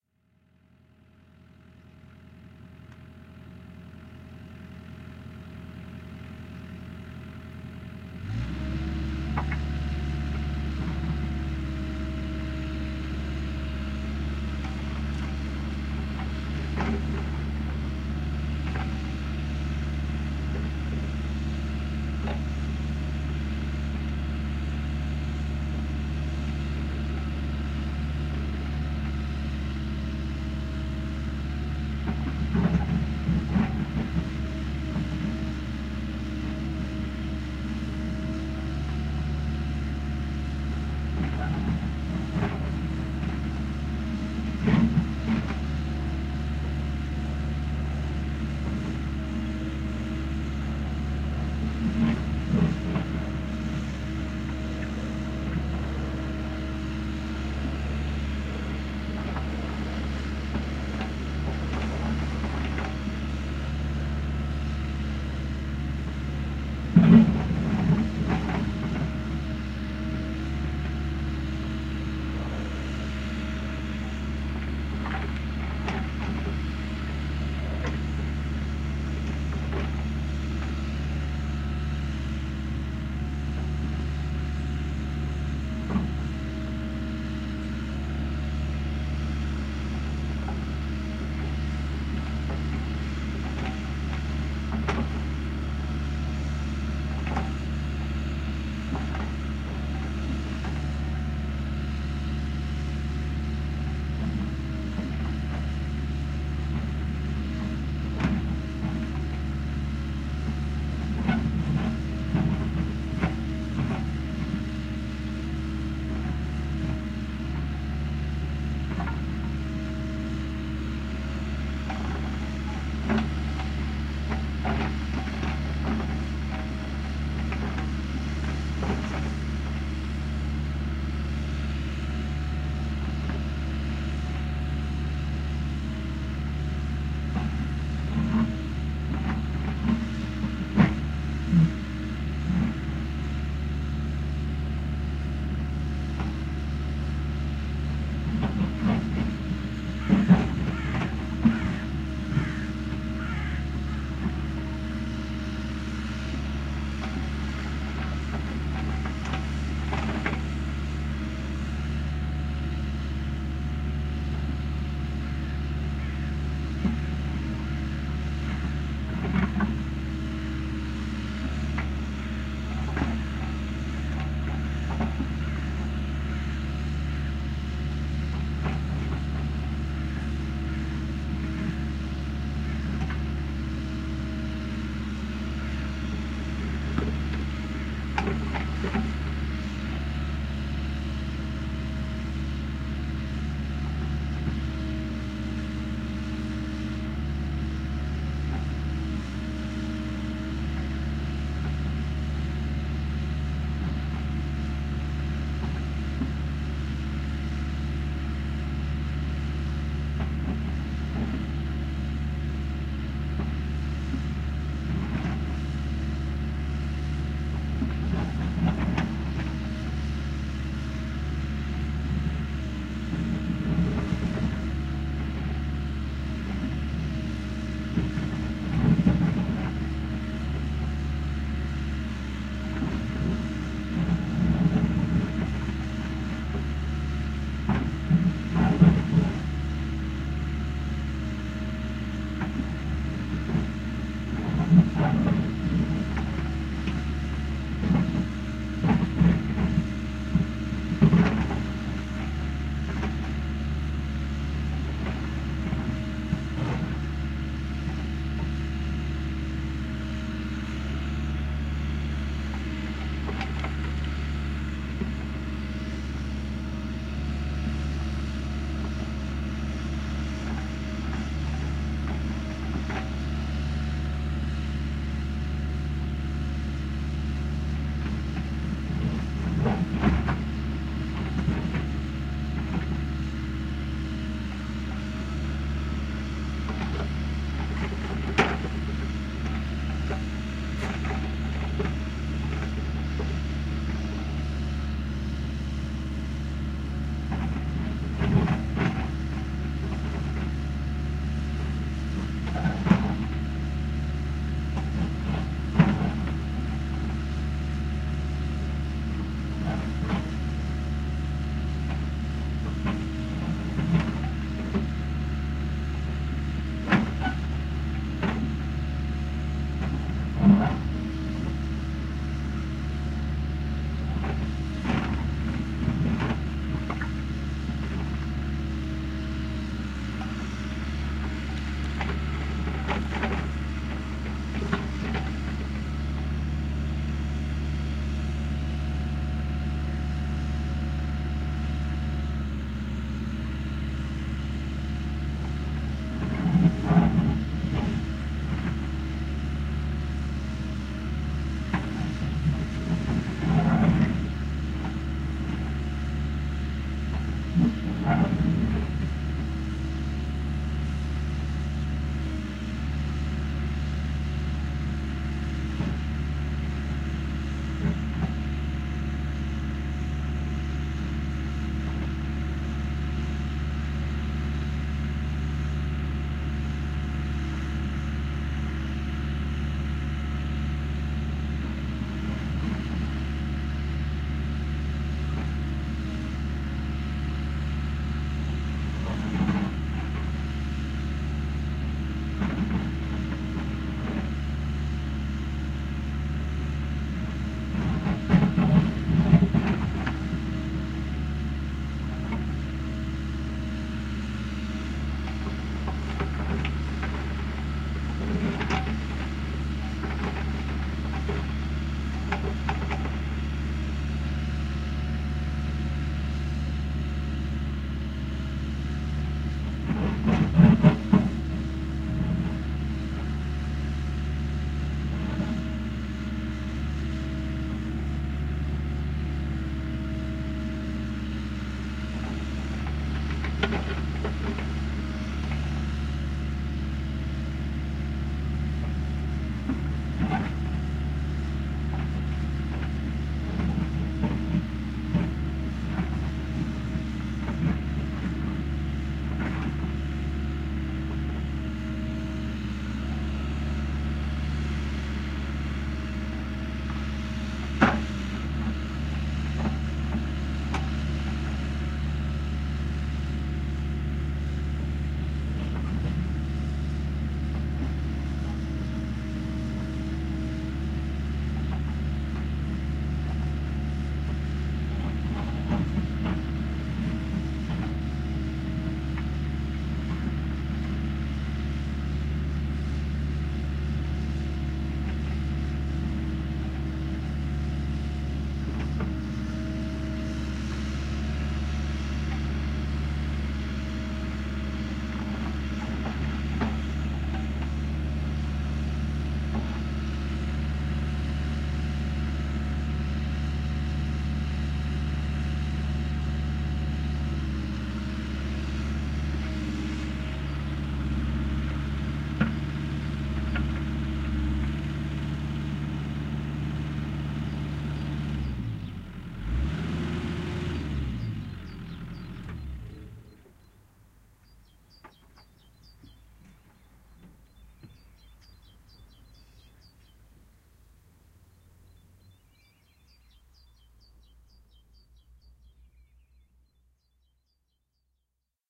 A stereo field-recording of a steel tracked 6 ton excavator digging stony ground. Rode NT-4 > Fel battery pre-amp > Zoom H2 line-in.
digger, tracks, machinery, xy, excavator, diesel, digging, excavating, field-recording